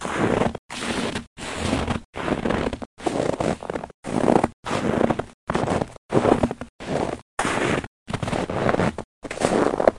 Snow Footsteps Foley 1222014
So I finally got my snow footstep foley recorded, yay.
Recorded on my Samson C01U condenser mic as usual.
Better yet, show me what you used it in! I love seeing my work used.